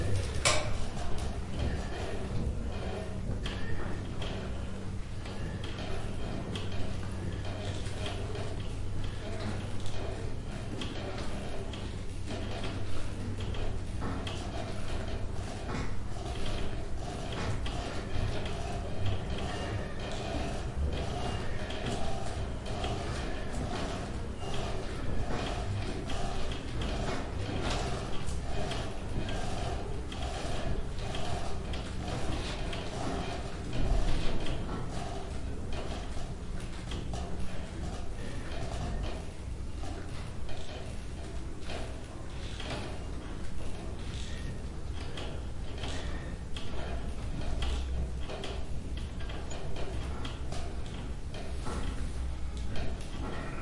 It's a creaky lake cabin
lake, creaky, dock, boat